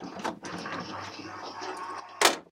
Opening an elevator door sample
Opening an elevator door really special. this is a good sample